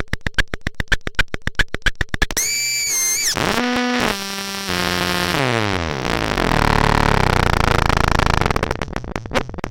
nordy glitch 008
beep, buzz, click, digital, fm, glitch, modular, modulation, noise, nord, pop, raw, synth, wave